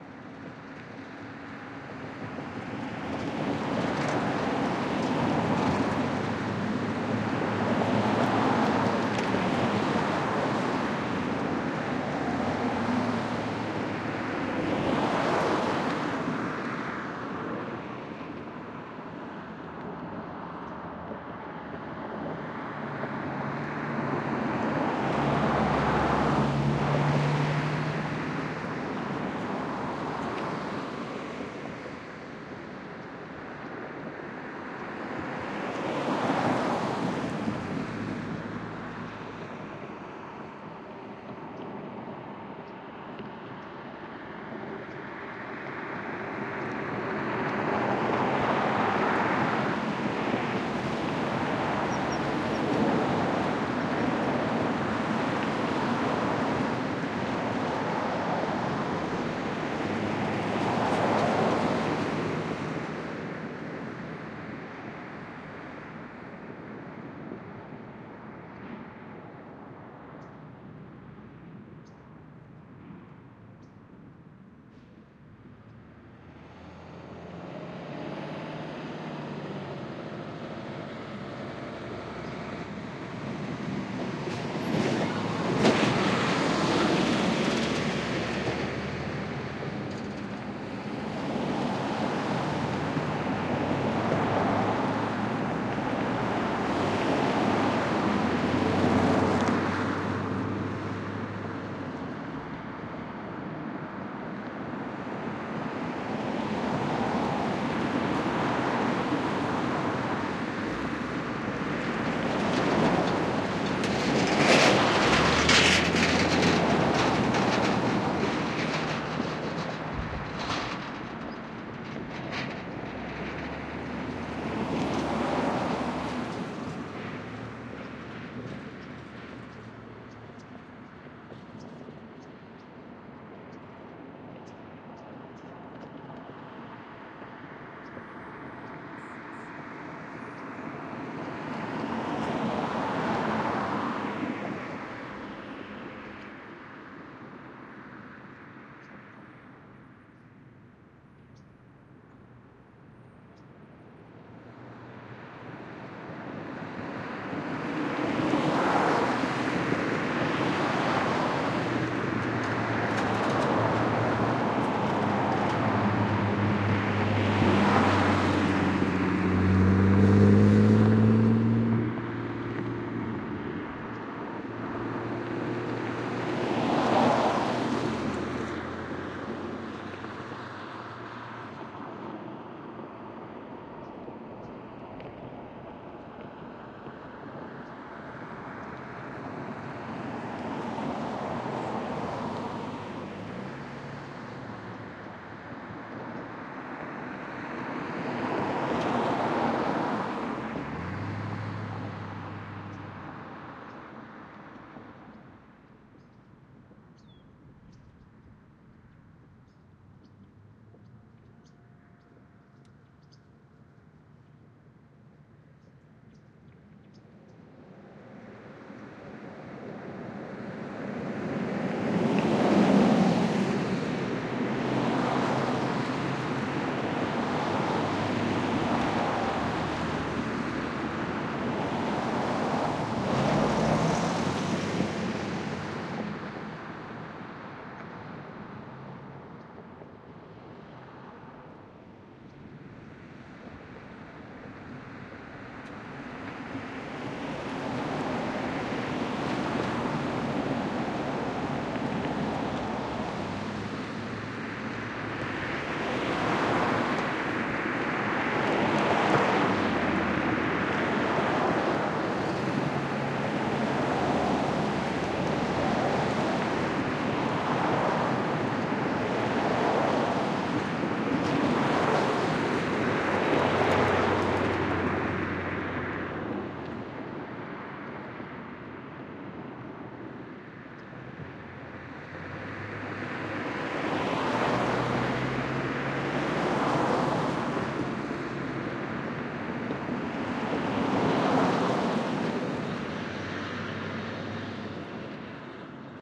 I used two Beyerdynamic MC930s, placed in ORTF fashion on the side of Ventura Blvd one evening. Some nice traffic ambiance.
Recorded with: Beyer MC930, Sound Devices 702t